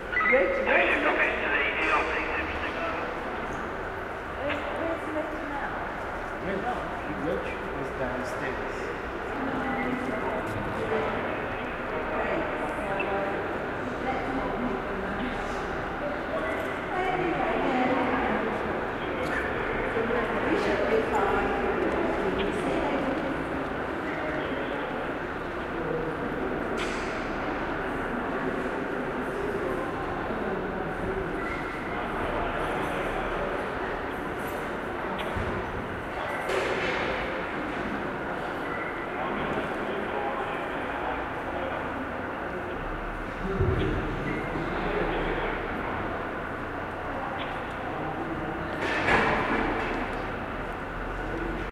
British Museum radio voice
A curator's radio and voice as well as the ambience of the large spaces of the British Museum in London. There is lots of natural reverb due to the vast size and hard surfaces. There is also a general background noise from ventilation and heating systems. Minidisc recording May 2008.